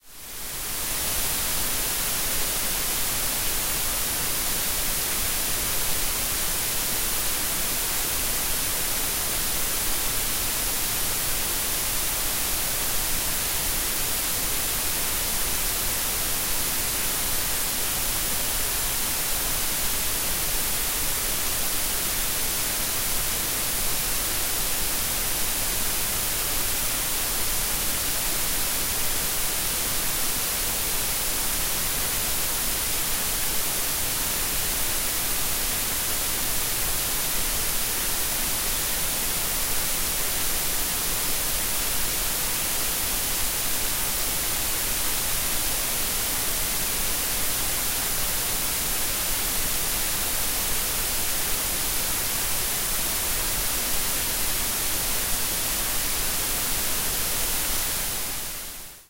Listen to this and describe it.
Accidental Noise, Zoom H6
Accidental noise created by recording through a Zoom H6 with no microphone input selected. I suspect the recorder may have picked up the noise of its internal components.
An example of how you might credit is by putting this in the description/credits:
The sound was recorded using a "Zoom H6 recorder" on 1st February 2018.